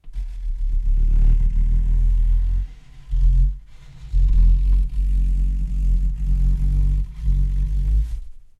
rustle.box-growl 5
recordings of various rustling sounds with a stereo Audio Technica 853A
box, cardboard, low, rustle